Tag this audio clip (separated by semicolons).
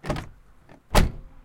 door; car